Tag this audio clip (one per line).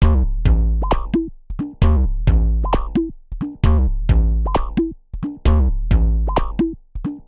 electronic
funky
rhythmic
techno